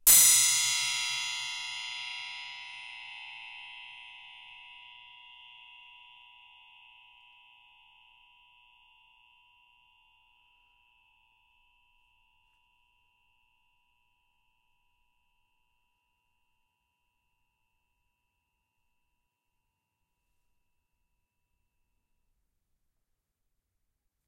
Clang single long 1
A metal spring hit with a metal rod, recorded in xy with rode nt-5s on Marantz 661. More to come
clang, discordant, Metal-spring, untuned-percussion